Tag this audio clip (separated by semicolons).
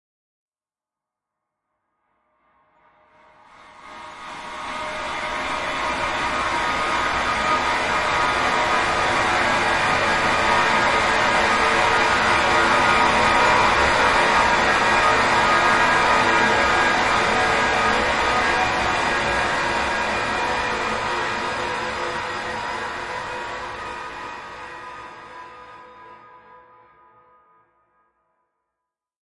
transition; science; psycho; horror; distant; electric; far